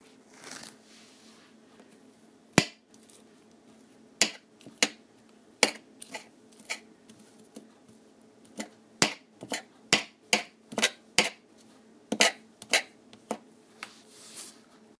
Chopping on hard surface